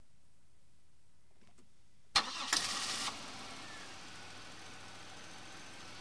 car start starter side
this is a recording of a 2000 Buick Lesabre being started on the starter side if the engine.
automobile, car, engine, idle, ignition, motor, start, vehicle